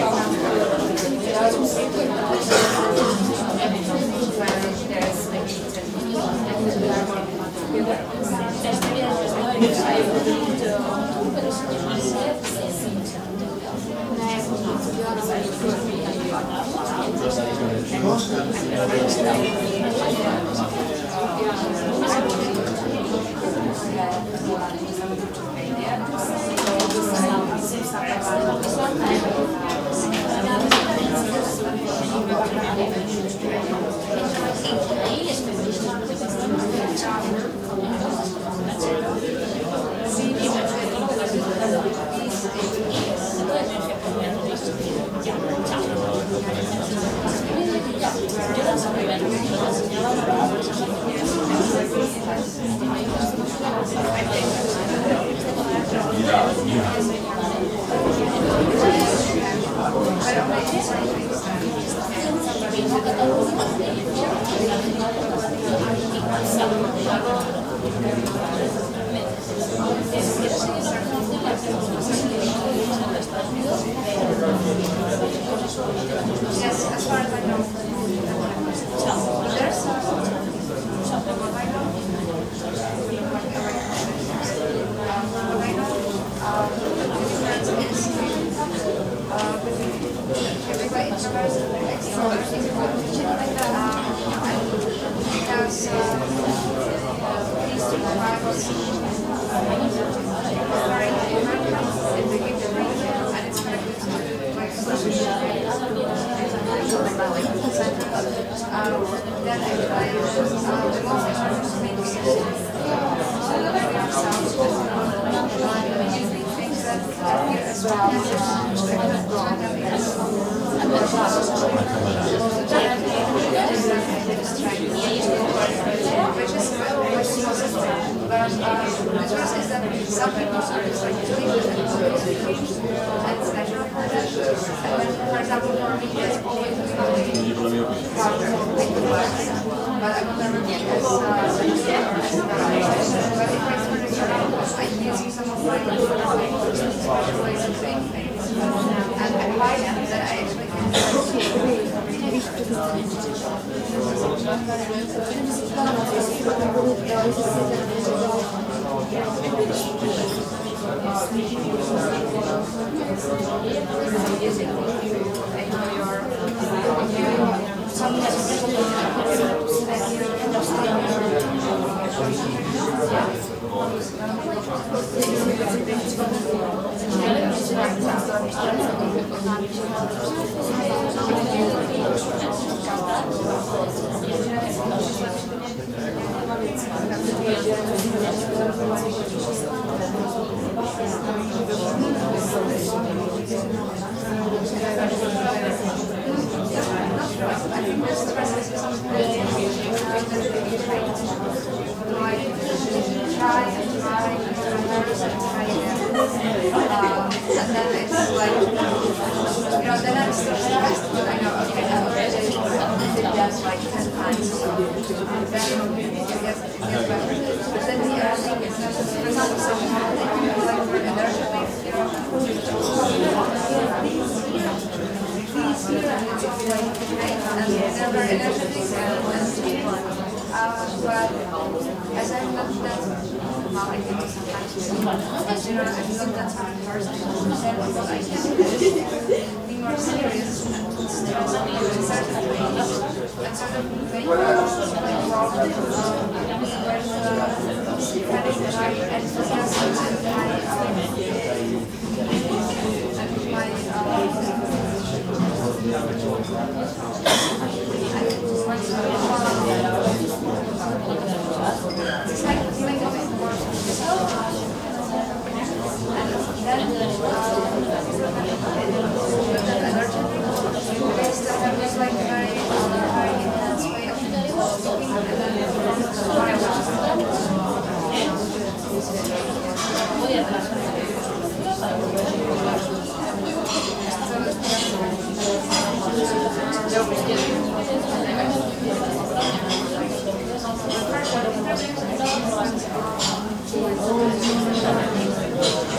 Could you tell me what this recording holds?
370973 waweee coffee-shop-ambience remastered
A nice crowded coffee shop (coffee shop sounds only, no music).
I was looking for a DIY solution to loop coffeeshop sounds along with playlists for working at home, and came upon this great recording from waweee:
I've edited the original to normalise it and remove bass distortion (makes it louder, clearer and more crisp). Thanks again for the original.